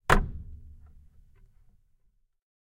Bassdrum Clack Noise 1
A noise I made with a bassdrum. Recorded in stereo with RODE NT4 + ZOOM H4.
skin, bassdrum, clack, bass, smack, kick, hit